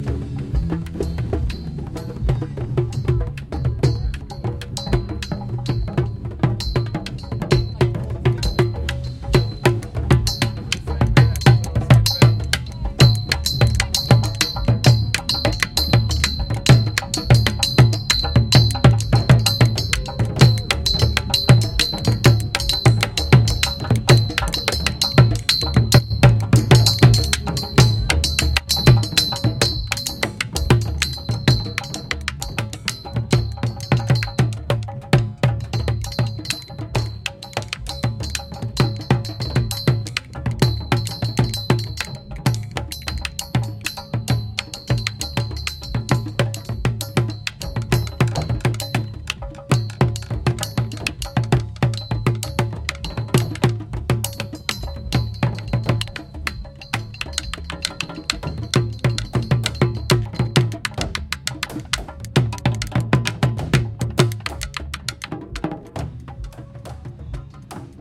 Trash drumming at Sark Roots Festival 2016 (pt9)
Trash drumming at Sark Roots Permaculture Festival 2016.
Recording of a set of interesting recycled objects mounted on scaffolding in the middle of the festival site. Recorded whilst festival was in full swing around the wildly improvising (mostly) amateur drummers on Saturday night
Recorded with a Tascam DR-40 portable recorder. Processing: EQ, C6 multi-band compression and L3 multi-band limiting.
Drumming, Festival, Roots, Sark, Trash